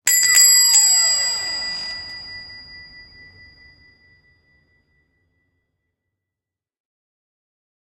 shop door bell+squeek
The Sound of a bell on a shop door as someone enters the shop. The bell sound is followed by the squeak as the door opens.
bell, shop